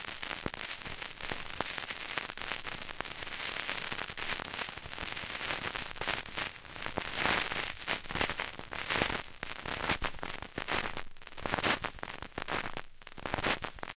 VINYL NOISES 3
vinyl record noise
quasi